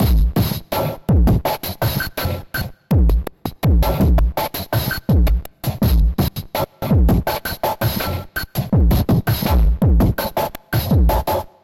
A chopped-up breakbeat.